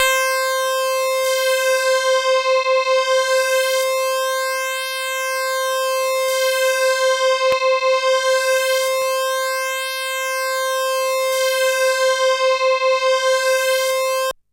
Soundsample from the Siel Opera 6 (Italy, 1982)
used for software samplers like halion, giga etc.
Sounds like the 8bit-tunes from C64
Note: C5
6, analog, analogue, c, c64, commodore, keyboard, opera, sample, samples, siel, synth, synthie